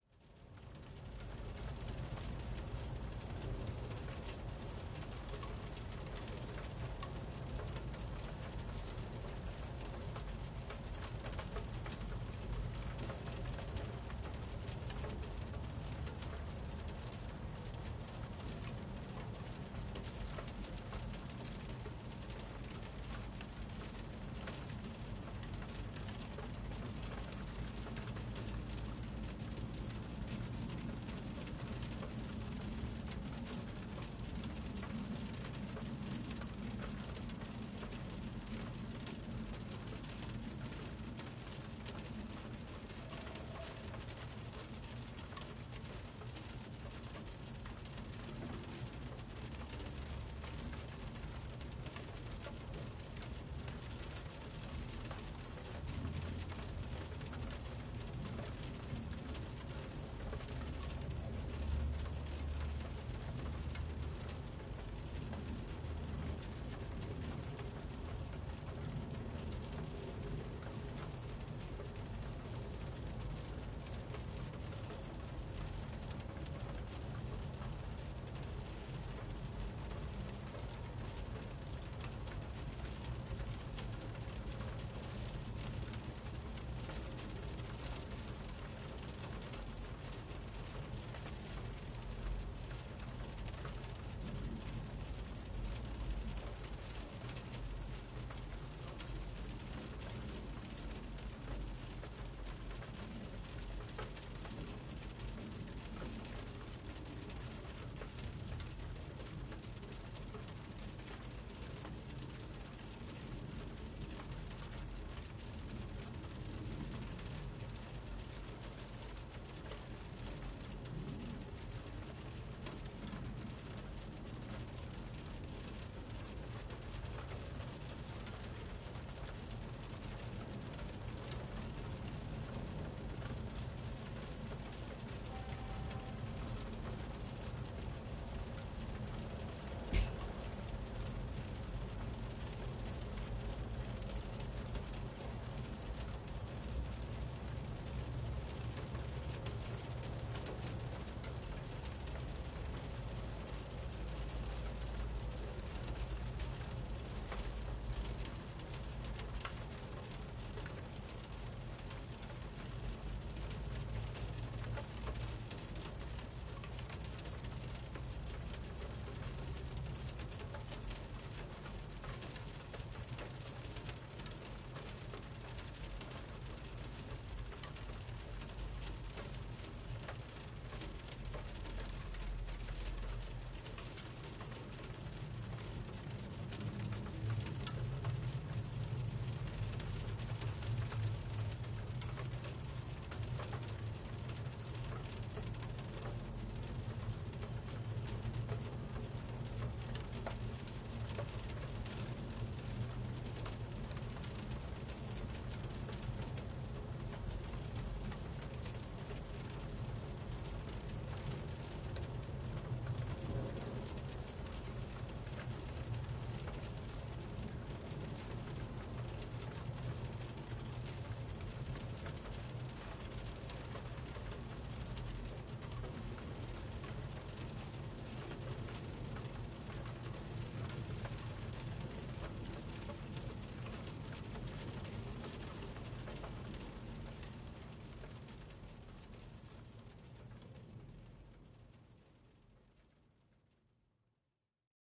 rain on dormer window inside
mke 40 - tascam dr40